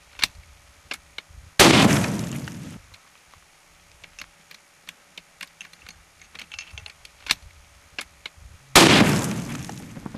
Me firing a friend's custom-made .50 BMG rifle. Sound of the bolt sliding into place along with two reports.Ripped from a personal home video. Recorded circa October 2007.